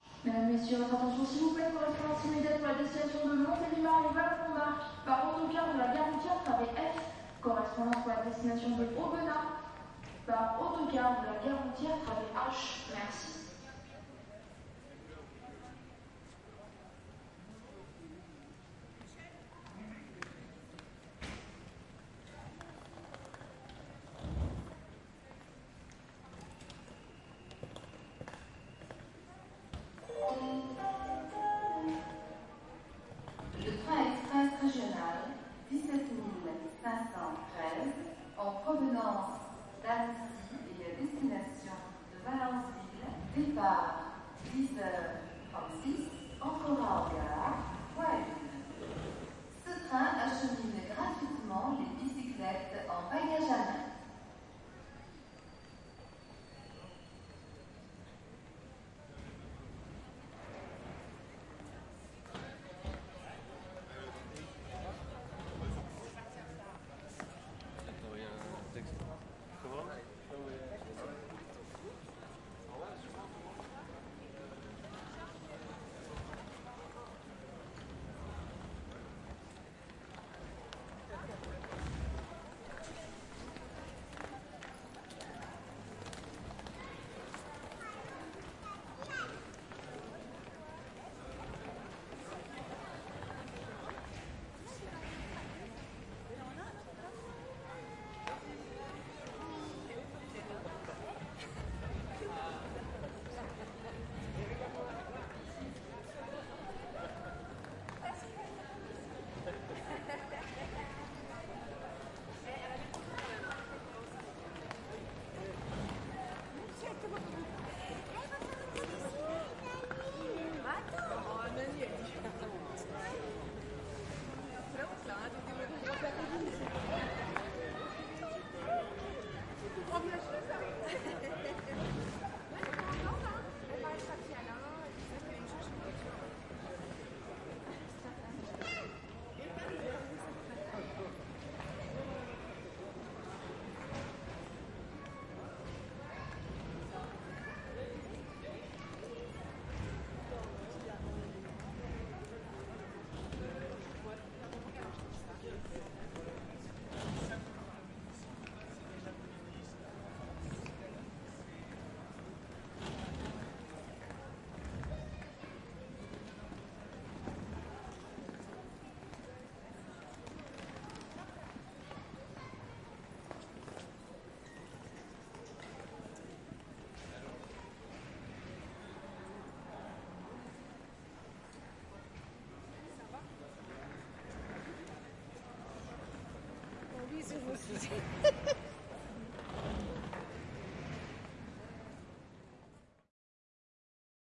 Train Station ambience
Just let record an ambience in the train station at Valence TGV. Multiples and typicals sounds from a train station. Like French announcements